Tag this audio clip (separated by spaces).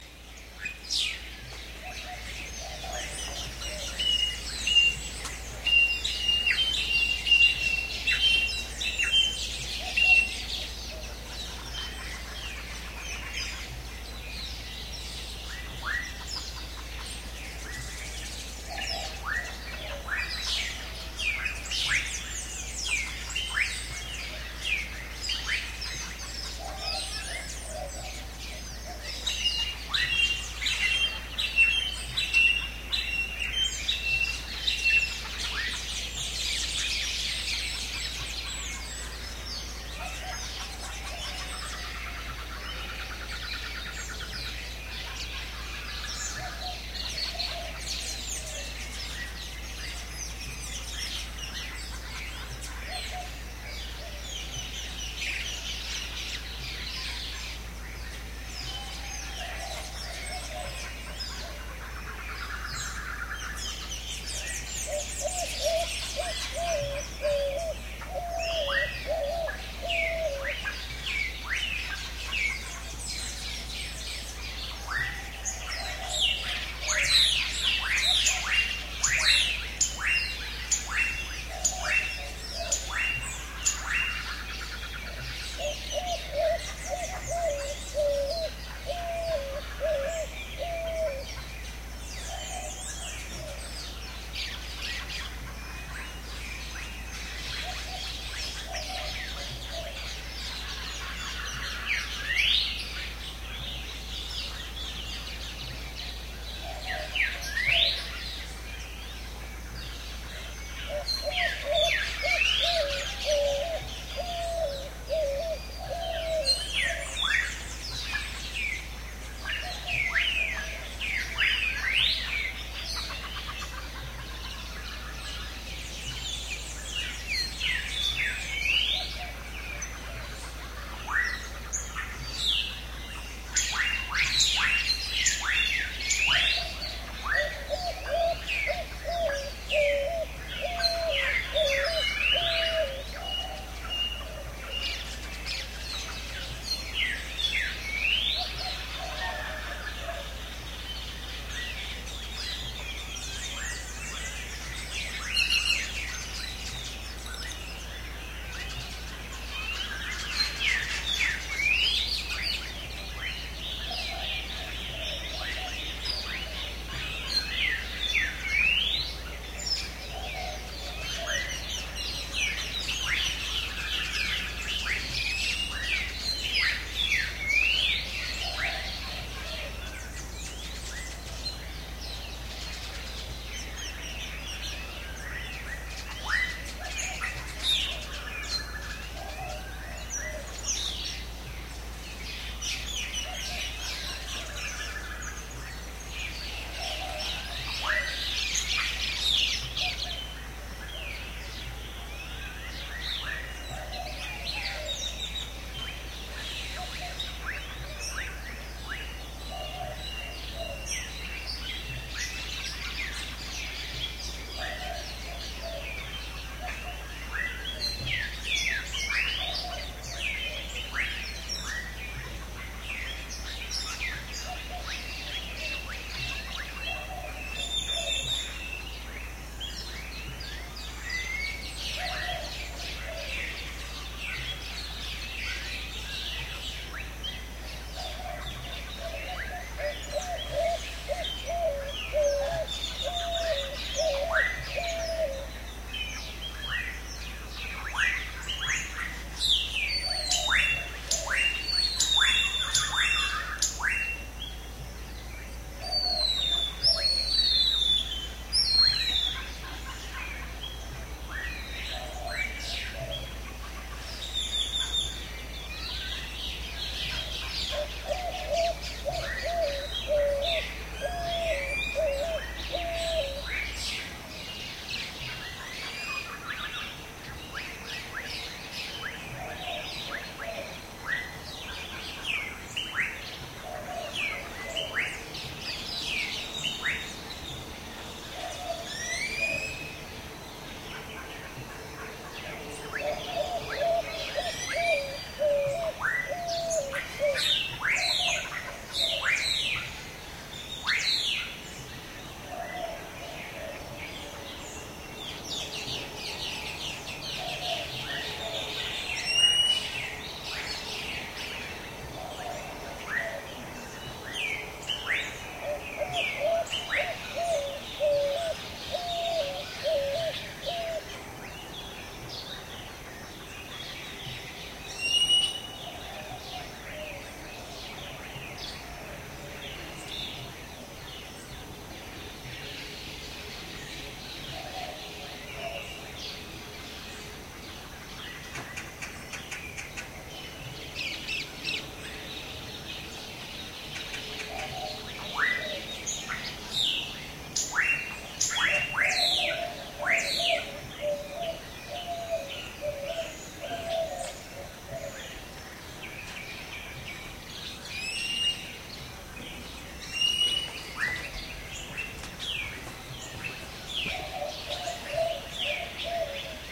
jungle mexico sounds